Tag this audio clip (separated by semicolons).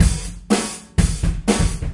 beat; drum; hihat; loop; open